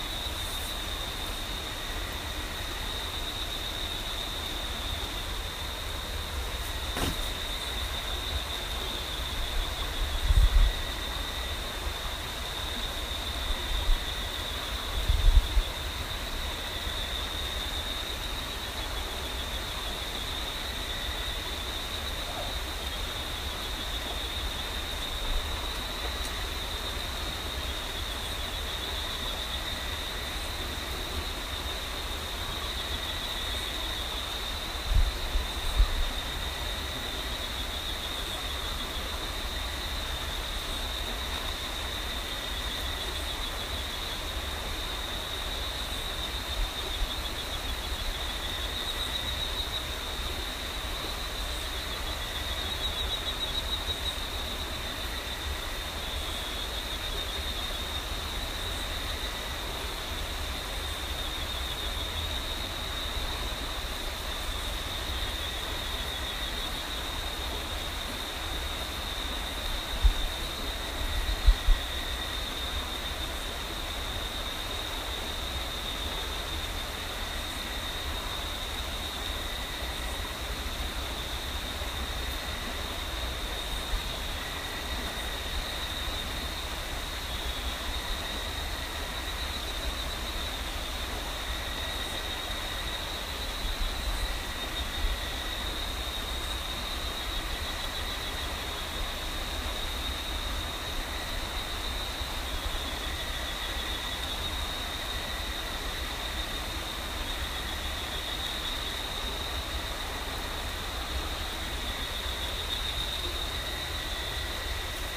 Costa Rica cloud forest at night
Night sounds recorded in the cloud forest in Monteverde Costa Rica. December 2015. Recorded on an iPhone.